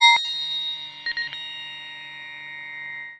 PPG 009 Noisy Digital Octaver C4
This sample is part of the "PPG
MULTISAMPLE 009 Noisy Digital Octaver" sample pack. It is a digital
sound effect that has some repetitions with a pitch that is one octave
higher. In the sample pack there are 16 samples evenly spread across 5
octaves (C1 till C6). The note in the sample name (C, E or G#) does
indicate the pitch of the sound but the key on my keyboard. The sound
was created on the PPG VSTi. After that normalising and fades where applied within Cubase SX.
digital, ppg, experimental, multisample